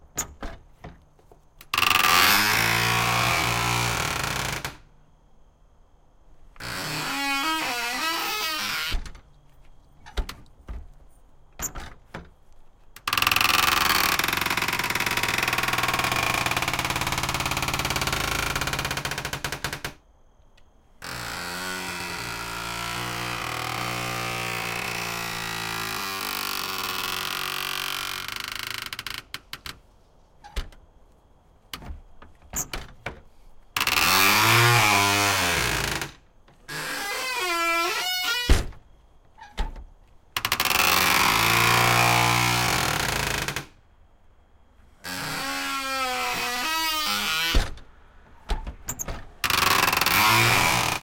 school bus truck ext back door open, close metal creak groan long
bus, ext, groan, creak, door, school, close, back, open, truck, long